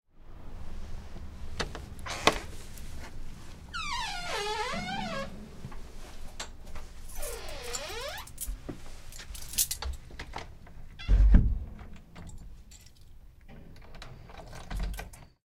open front door close creak
Here I enter a house, so you here the lock and keys. Then creaking. Of course the environment sound changes dramatically when you go inside.
Recorded with Sound Devices 722 HD recorder and a Sennheiser MKH-415T Shotgun microphone
door, front, squeak